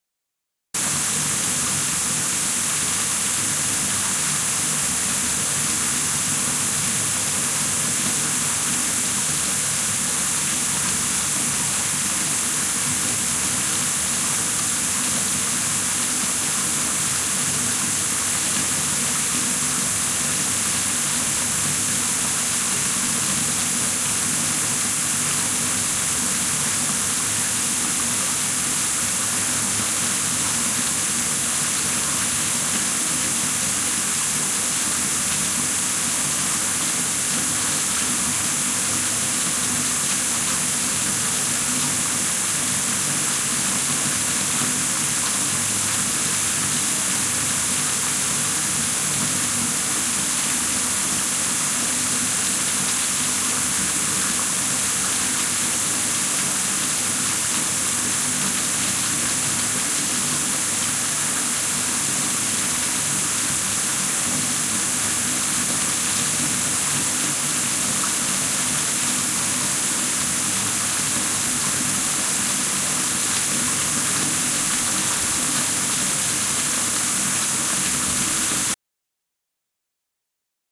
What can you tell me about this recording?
Water running down the bath tub, medium intensity...